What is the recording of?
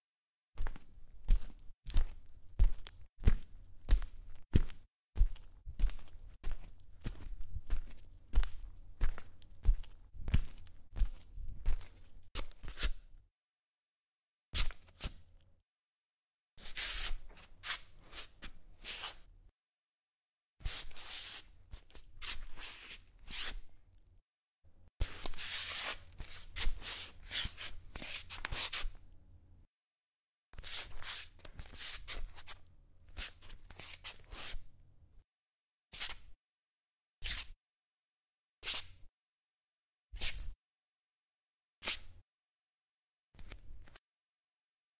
footsteps barefoot parquet
Slowly walking on a wooden floor.
EM172 -> Battery Box-> PCM M10.